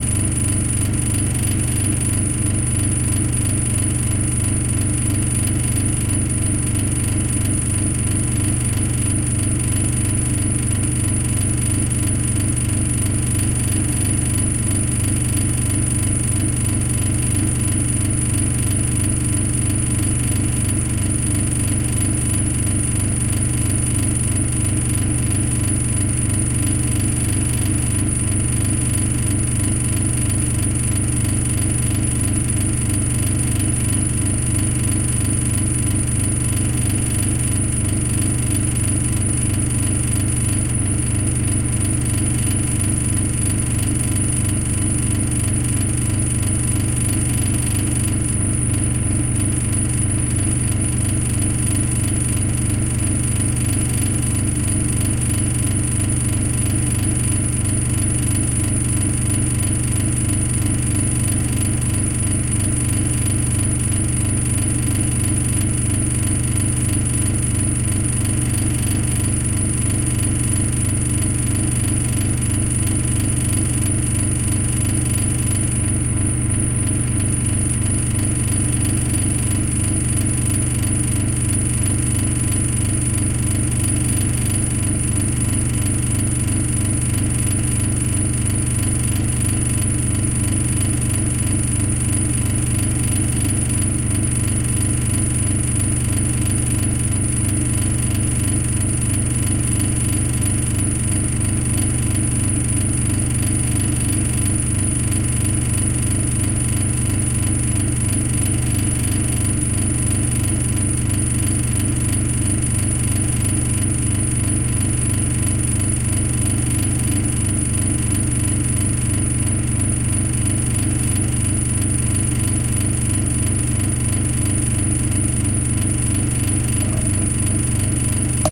Recorded on Zoom H6. Very old fridge Океан-4 (Ocean-4) Russia.